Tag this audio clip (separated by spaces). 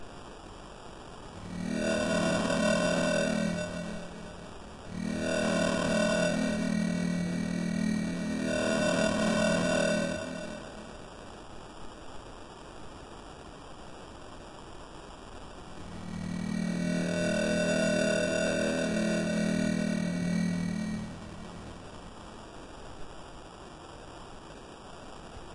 Monotron-Duo bit-crusher